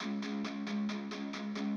Electric Guitar C Sharp Straight
These sounds are samples taken from our 'Music Based on Final Fantasy' album which will be released on 25th April 2017.
C, Electric, Electric-Guitar, Guitar, Music-Based-on-Final-Fantasy, sample, Sharp